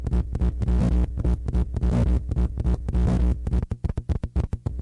a basic low glitch rhythm/melody from a circuit bent tape recorder
bass, bent, circuitbending, electricity, electronic, glitch, hum, lofi, noise